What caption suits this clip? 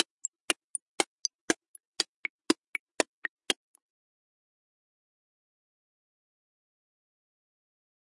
a timing in a electronic style.made with a sampler from ableton